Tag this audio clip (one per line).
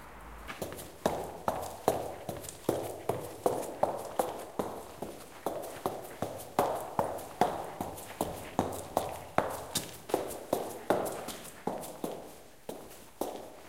fast
huge
room
steps